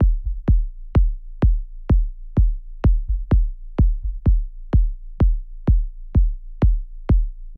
Part of 7 sounds from Corona sound pack 01\2022. All sounds created using Novation Bass Station II, Roland System 1 and TC Electronics pedal chain.
Unfinished project that I don't have time for now, maybe someone else can love them, put them together with some sweet drums and cool fills, and most of all have a good time making music. <3
deep, kick, low